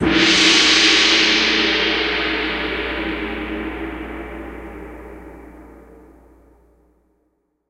⇢ GREAT Fx Gong 1
Fx Gong. Processed in Lmms by applying effects.
cinematic-gong, gong, gong-cinematic, gong-fx, gong-sfx, gong-temple, shaman, temple-gong